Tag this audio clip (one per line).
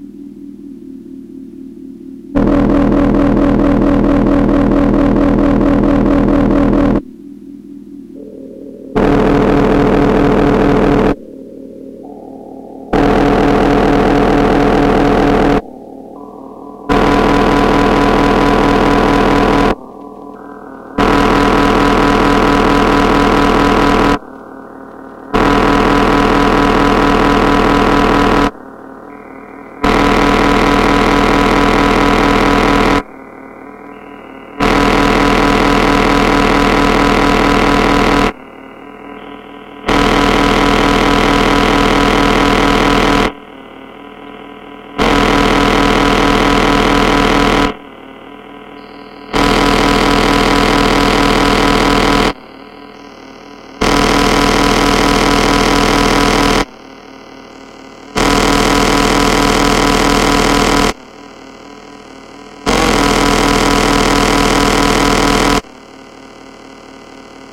Kulturfabrik
Synthesizer